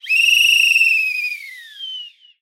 A coach whistle recorded indoors in a quiet room using a Audio-Technica BP4029 MS Shotgun Mic into a Focusrite Scarlett 18i20 Gen 2. Only the center channel was used. Samples were cleaned up with spectral noise filtering in iZotope RX. They were trimmed, faded, and peak normalized to -3dB by batch process in Adobe Audition. No EQ or compression was applied.
Sound Design, Music Composition, and Audio Integration for interactive media. Based in Canberra, Australia.